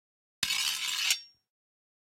Sliding Metal 14
clang, shield, blacksmith, iron, shiny, steel, rod, metallic, metal, slide